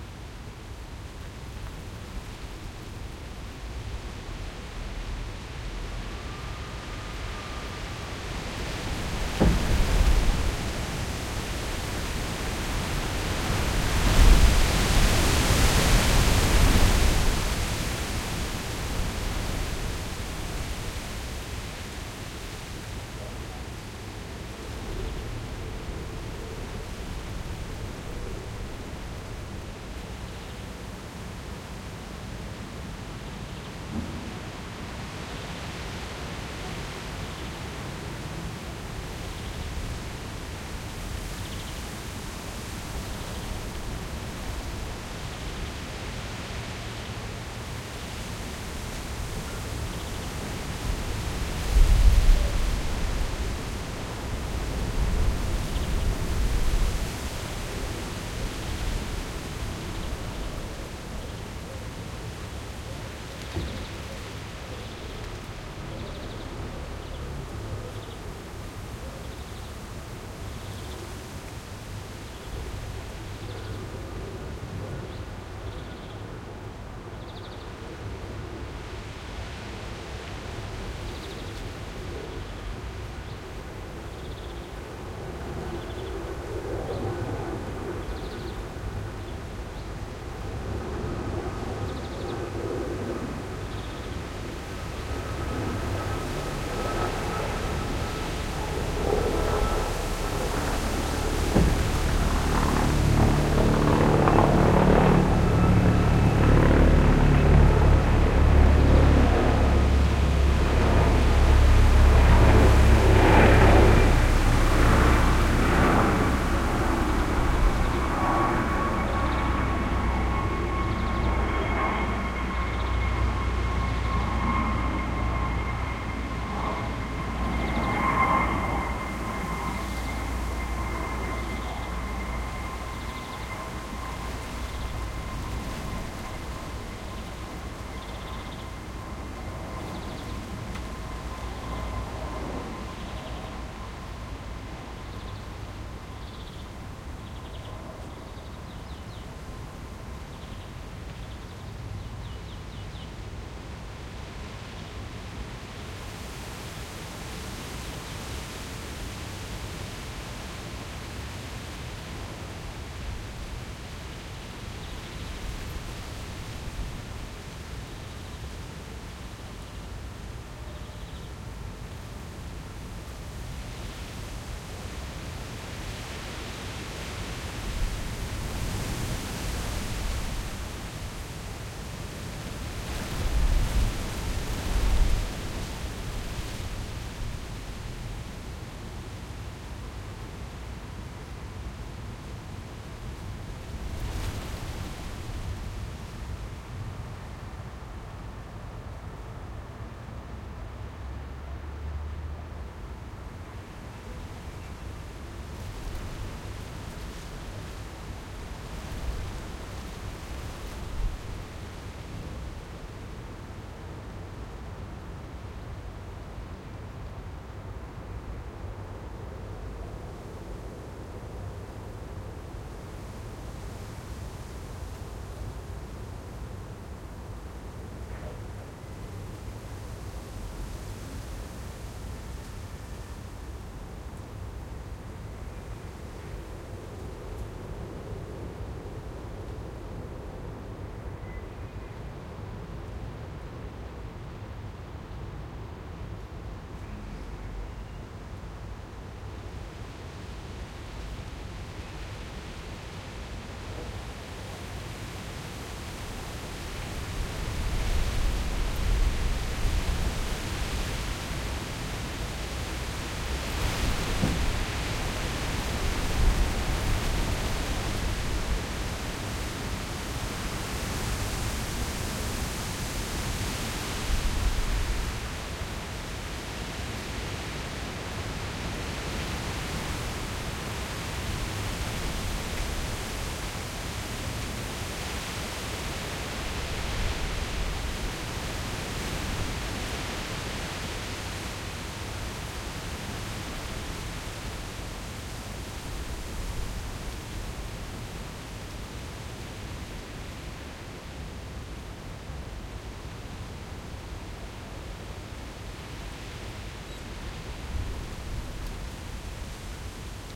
strong wind and that helicopter
The "strong wind" recording turned out to be one of a helicopter flying over. Sennheiser MKH60 microphones, Wendt X2 preamp into PCM-D50.